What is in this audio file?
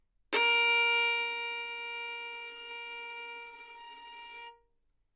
Violin - Asharp4 - bad-dynamics-decrescendo
Part of the Good-sounds dataset of monophonic instrumental sounds.
instrument::violin
note::Asharp
octave::4
midi note::58
good-sounds-id::1882
Intentionally played as an example of bad-dynamics-decrescendo